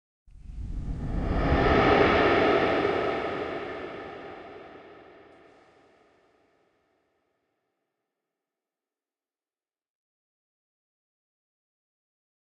terror scary suspiro whisper
Creeppy sound I made with my voice and some free VSTs
creepy, thrill, phantom, suspense, anxious, spooky, terrifying, terror, background-sound, Gothic, dramatic, sinister, atmos, weird